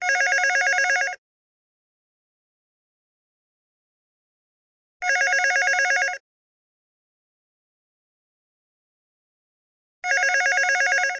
A telephone ring.
It was recorded with one Zoom H4N
wave
48.Khz
16Bit
Stereo
MárcioDécio
zoom, Telephone